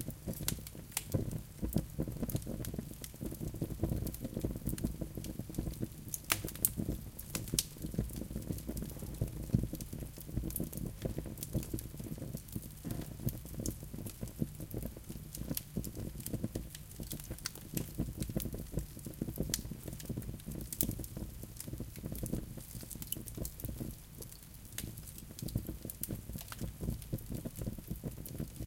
Recorded in the lounge-room of my house with a ZOOM H2N